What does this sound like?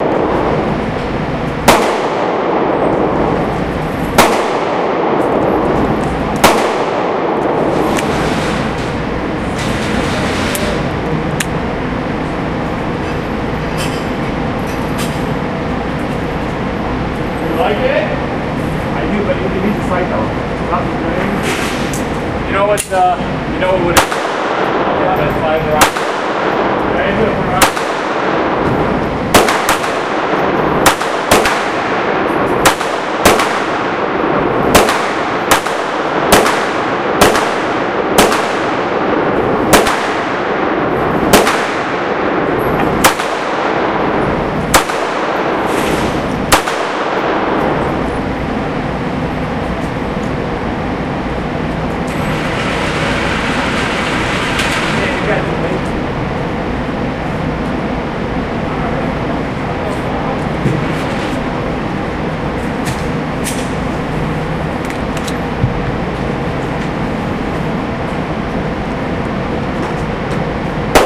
Sounds from an indoor gun range, inside the booth. Most shots are from a 22 caliber Smith & Wesson.
45-caliber, boom, bullets, fire, gun-range, indoor, 9-millimetres-caliber, 22-caliber